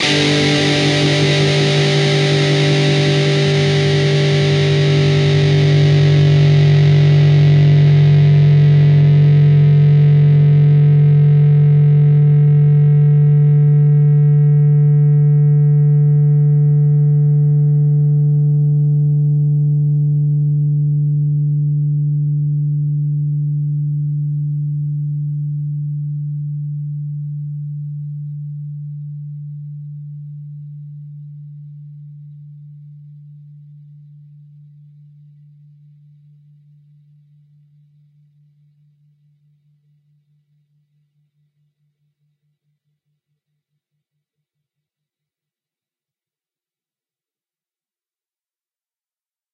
Dist Chr A&D 5th fret
A (5th) string 5th fret, and the D (4th) string 5th fret. Down strum.
chords, distorted, distorted-guitar, distortion, guitar, guitar-chords, rhythm, rhythm-guitar